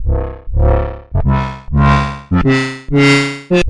Sub 37 Lurking 130bpm
Moog, Synth, Bassline